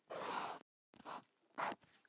Felt tip pen drawing arrow 03
Drawing an arrow with an Artline 204 FAXBLAC 0.4 fineline pen. Recorded using an AKG Blue Line se300b/ck93 mic.